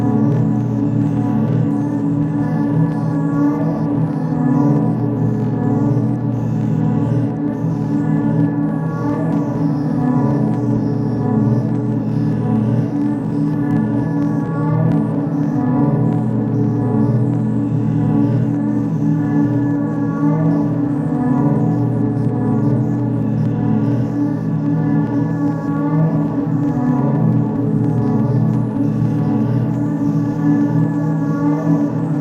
One in a small series of sounds that began as me making vocal sounds into a mic and making lots of layers and pitching and slowing and speeding the layers. In some of the sounds there are some glitchy rhythmic elements as well. Recorded with an AT2020 mic into an Apogee Duet and manipulated with Gleetchlab.
creepy, dark, echo, eerie, glitch, singing, spooky, vocal, voice